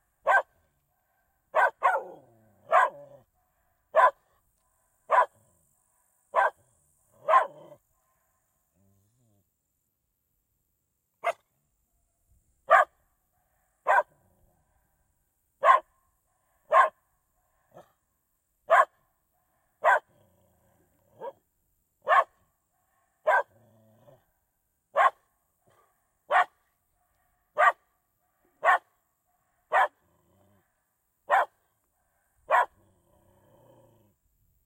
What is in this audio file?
Dog barking at river
Dog barks near the Volga river. The sound of echo and grasshoppers.
Sample was taken using Rode Stereo VideoMic PRO.
pet, animal, dog